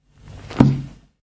Closing a 64 years old book, hard covered and filled with a very thin kind of paper.